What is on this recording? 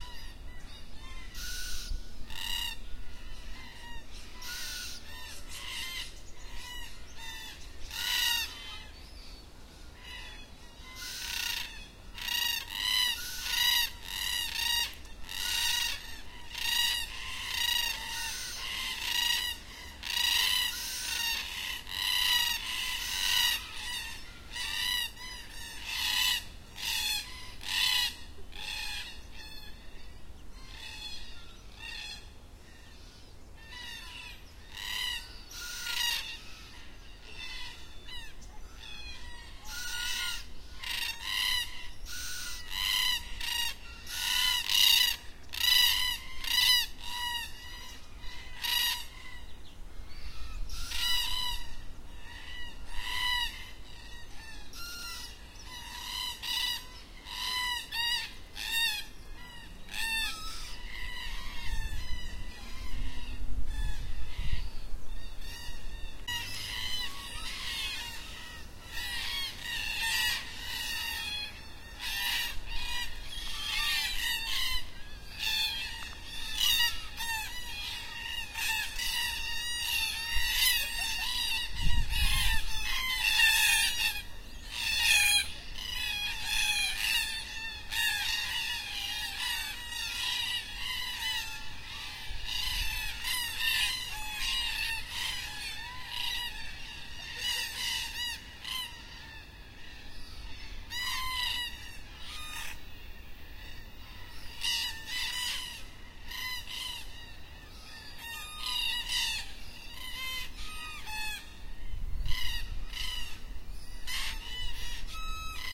Perth Black Cockatoos at Dusk
This is a snippet of Perth's majestic bird life. The birds that feature in this recording are Black Cockatoos, and it was taken at the Kensington Bushland Reserve at dusk. These birds are tribal, have incredible energy, and are extremely communicative, and hearing them squawk is one of my favourite parts of living here.
australia, bird, birds, birdsong, cockatoo, field-recording, forest, nature, perth, summer, western-australia